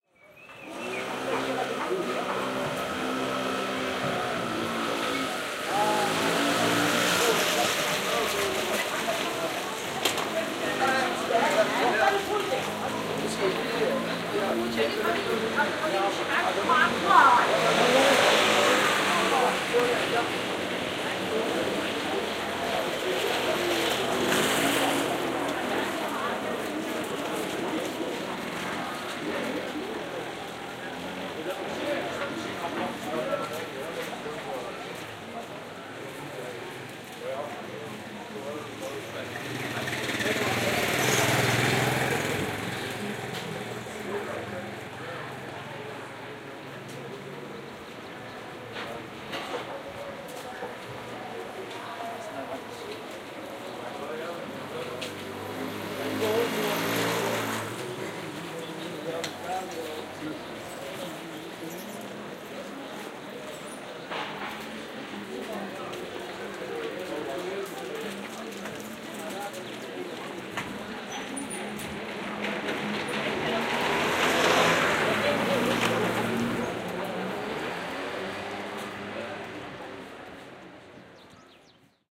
soeks medina marrakesh
This recording was made in Medina, Marrakesh in February 2014.
Binaural Microphone recording.
Soeks, motorcycle, people, traffic, Marrakesh, Medina, market